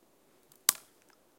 I recorded a sound of breaking a twig in the forest.